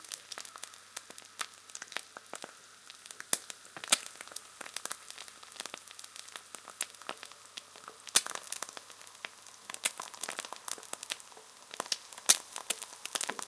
Frying an egg. Recorded using a Rode NT4 into a Sony PCM D50.